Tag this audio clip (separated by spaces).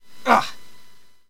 Ouch character Pain Damage grunting inflicted